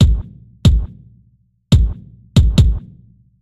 Drums loop 140BMP DakeatKit
140bpm, drums, groove, loop